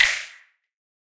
this series is done through layering and processing many samples of drum sounds i synthesised using various plugins namely xoxo's vst's and zynaddsubfx mixed with some old hardware samples i made a long time ago. there are 4 packs of the same series : PERC SNARE KICK and HATS all using the same process.